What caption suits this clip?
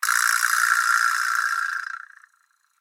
vibraslap small01
Small vibraslap single hit.